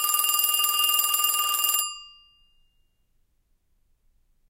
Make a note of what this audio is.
Phone Ringing #1
noise, phone, ring, ringing